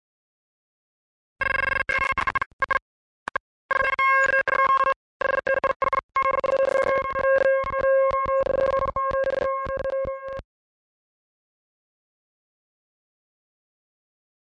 I was trying to use a web DX7 emulator to record some synth pads, but I had too many other tabs open and the connection was pretty bad. The result was this odd sound.